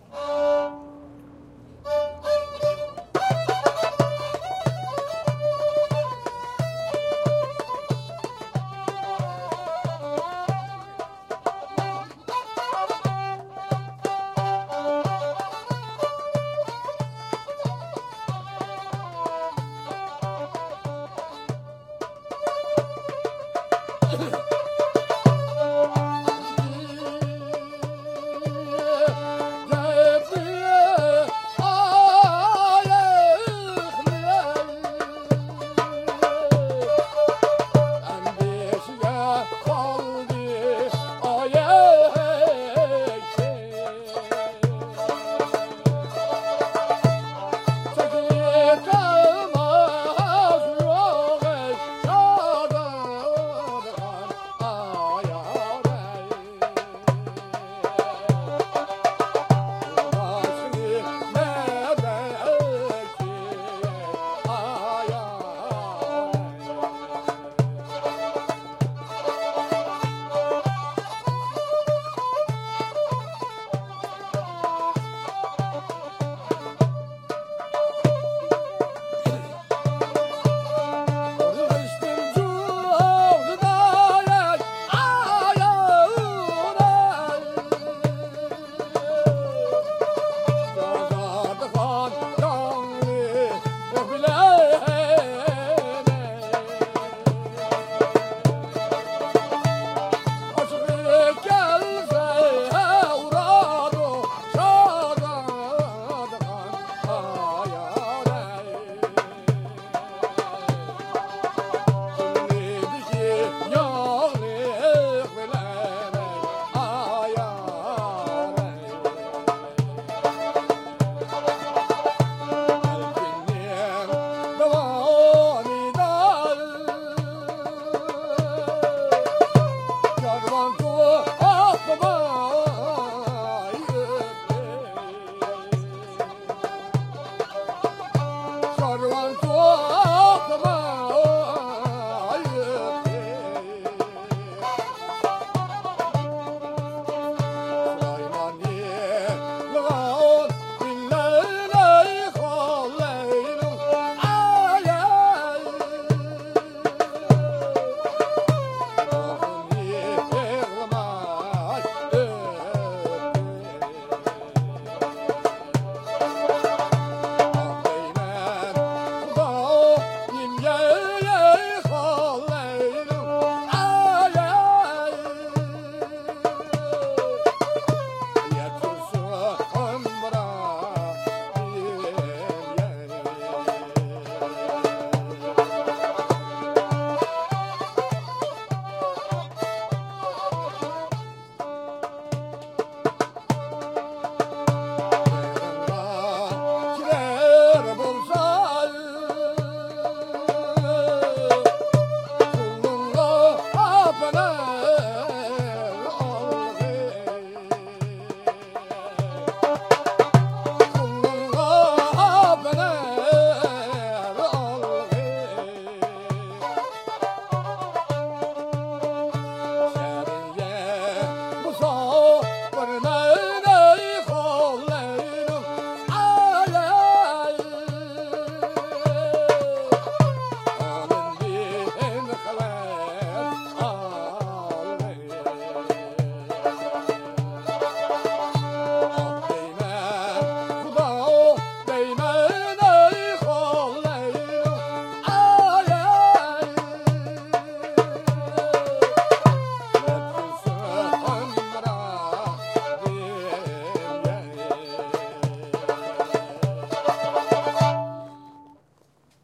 Uighur Traditional Music 1
Uighur Traditional music, recording 1.
A traditional song of the Uighur people recorded in the ancient Silk-Road oasis town of Turpan. Herein, an old man sings and plays a violin-type instrument. The instrument is played while seated, the base is balanced on the knee (strings outward), and spun relative to the bow to play the notes. The old man is accompanied by his son on doumbek.
Field recording made in Turpan, Xinjiang province, PRC.
Sony PCM-D50
arabic, camel, caravan, china, darabukka, derbocka, desert, doumbek, drum, dumbelek, fiddle, field-recording, instrument, Islam, Muslim, oasis, road, silk, silk-road, string, traditional, Turpan, turpan-depression, Uighur, Uyghur, violin, voice, world, Xinjiang